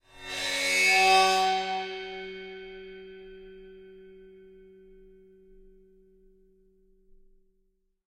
Cymbal recorded with Rode NT 5 Mics in the Studio. Editing with REAPER.
percussion, china, sabian, splash, sound